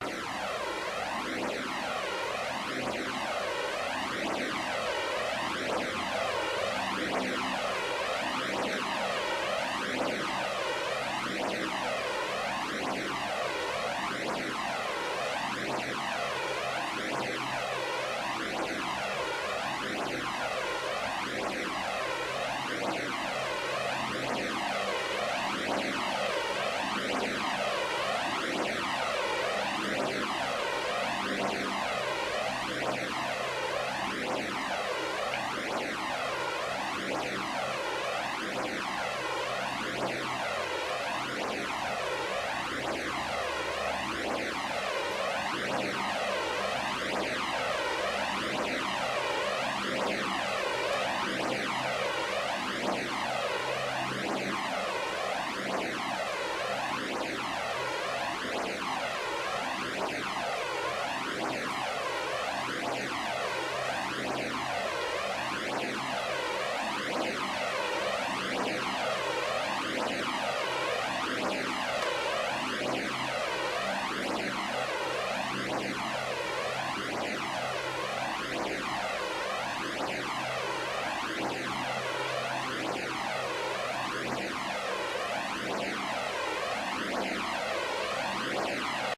wooshing vortex
a sound I recorded which sounds like a vortex
Vortex, wind, wooshing